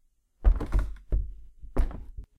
Stepping on a trapdoor.